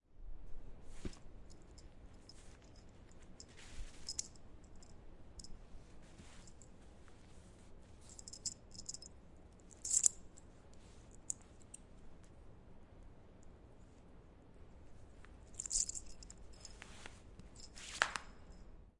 Removing belt
Recorded with a zoom H6. Removing my belt from my pants
belt, leather, putting, removing, slap